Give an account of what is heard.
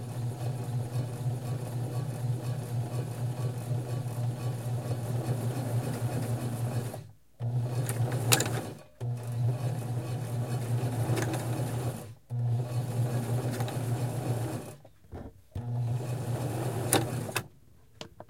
a sewing machine at work!
recorded with a zoom mic
appliances, household